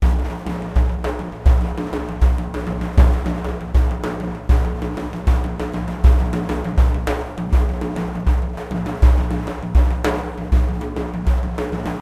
6/8 fast daf rythm with rode NT4 mic, presonus preamp
drums, frame, orient, odd